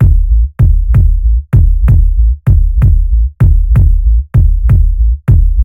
Experimental Kick Loops (20)
A collection of low end bass kick loops perfect for techno,experimental and rhythmic electronic music. Loop audio files.
drum-loop, Techno, groovy, percussion-loop, 2BARS, design, 120BPM, rhythm, 4, BARS, rhythmic, dance, loop, drum, percs, end, Low, groove, bass, beat, sound, kick